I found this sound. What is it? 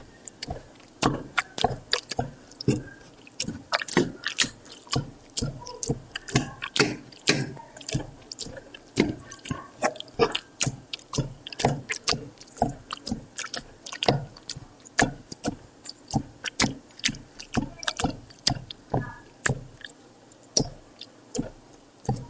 smashing beans
An... odd sound. Smashing black beans in a pan to make a delicious dip. Recorded with a Sony IC Recorder and processed in FL Studio's Edison sound editor.